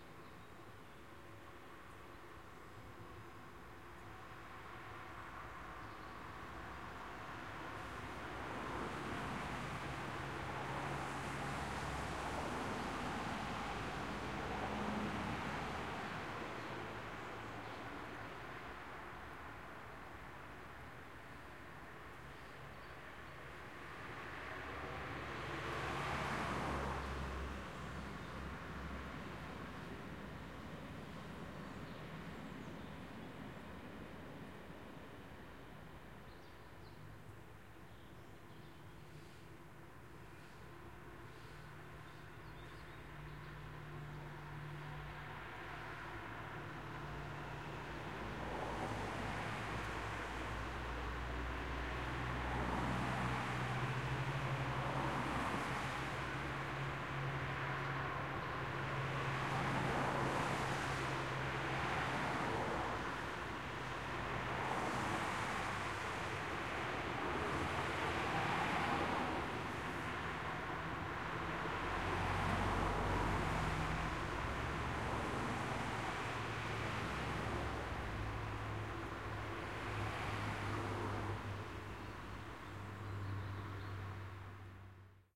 Residential Street Distant Traffic Wet Road
Wet, Traffic, Road, esidential, Street, Distant